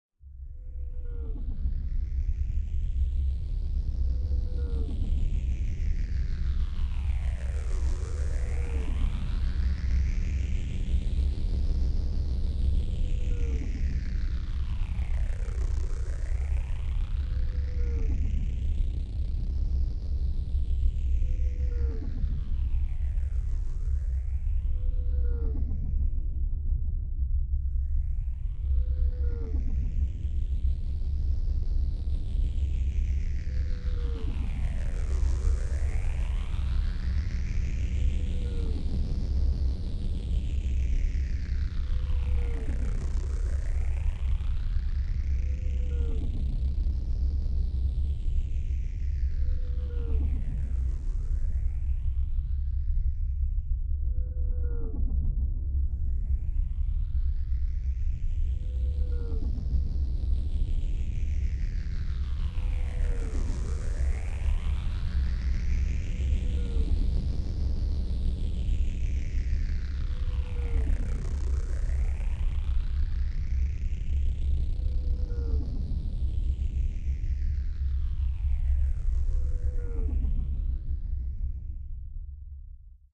UFO-starship-engine
I made this for a futuristic starship engine backdrop noise.
alien, engine, futuristic, power, starship, throb, ufo, weird